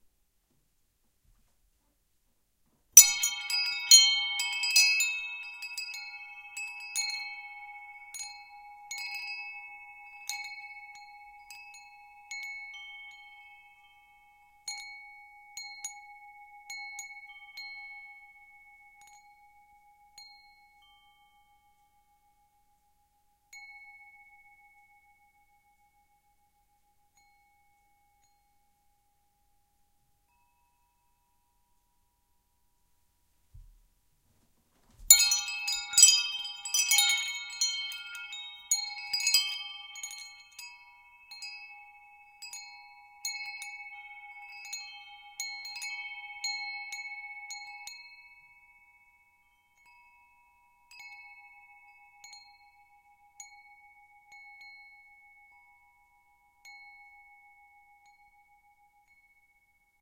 Wind-Chimes01
Wind-Chimes stereo record with beyerdynamics "mce 530"
metal, wind-chimes, Wind, percussion, stereo, chime